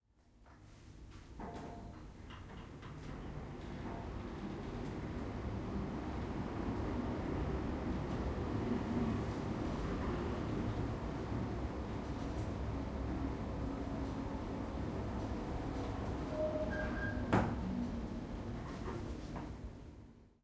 elevator travel 1
The sound of travelling in a typical elevator.
Recorded in a hotel in Surfer's Paradise with a Zoom H1.
travelling, moving, elevator, mechanical, lift